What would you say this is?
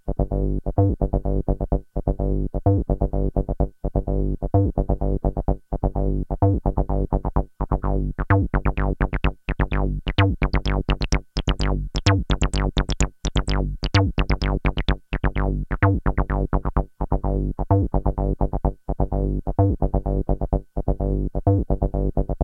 LD Acid
Created and formatted for use in the Make Noise Morphagene by Lewis Dahm.
A simple acid bassline with resonance modulation, created in the TT-303 BassBot.
303, acid, bass-bot, bassbot, lewis-dahm, mgreel, morphagene, resonance, tt-303, tt303